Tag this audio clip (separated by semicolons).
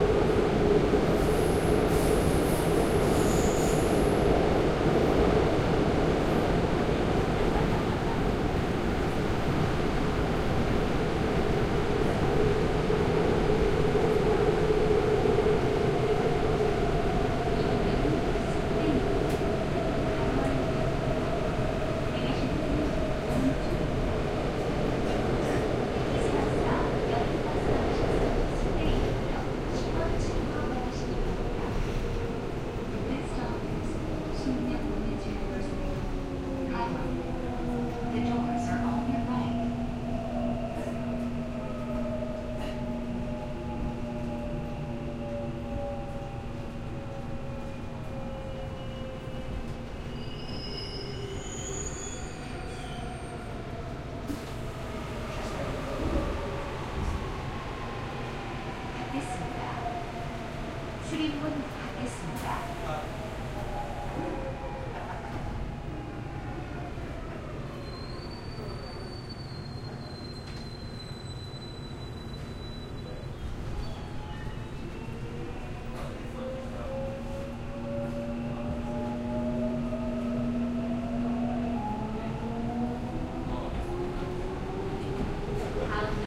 transport; train; seoul